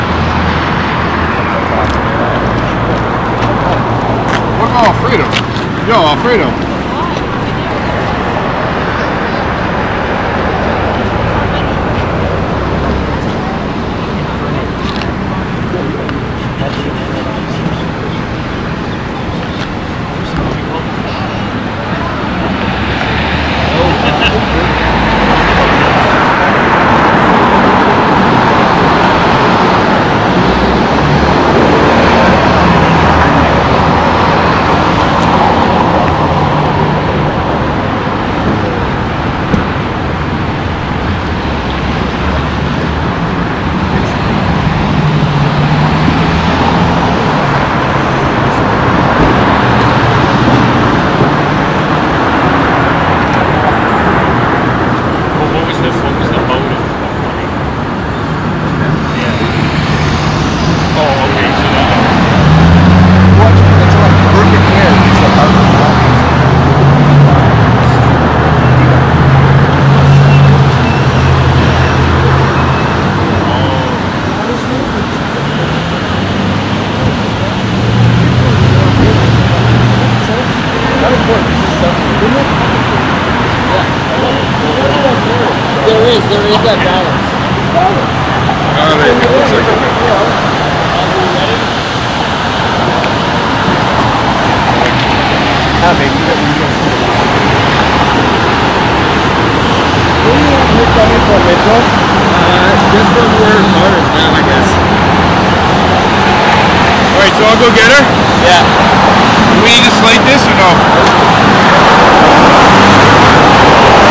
Traffic AMB-T001 - hamilton ontario
traffic ambience made in hamilton ontario in january 2023